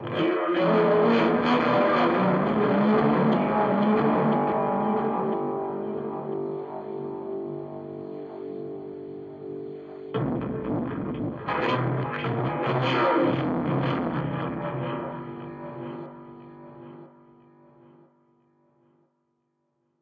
A heavily processed sound of a mic scraping on guitar strings.